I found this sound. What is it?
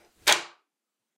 Bolt Lock 4
deadbolt / lock being used
Bolt, Lock, Metal, Unlock, chest, key, turn